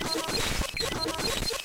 JCA loop-02
glitch loop from my bent casio ct460 keyboard
circuit-bent, abstract, glitch, sound-sculpture